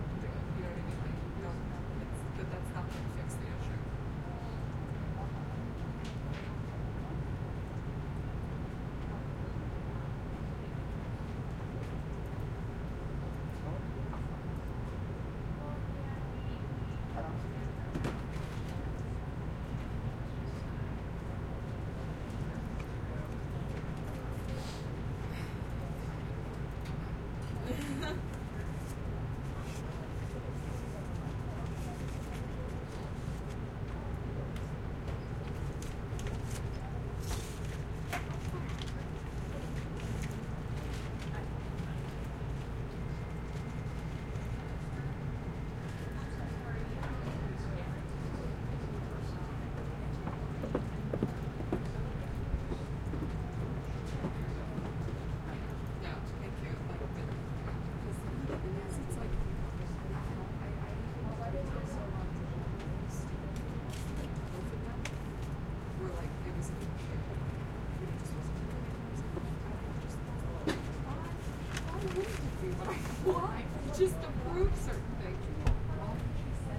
office reception area calm heavy ventilation and conversation MS
area, calm, heavy, office, reception